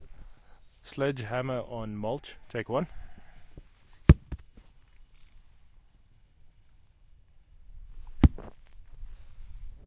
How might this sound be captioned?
180081 Sledge Hammer on Mulch 01
A sledge hammer slamming the ground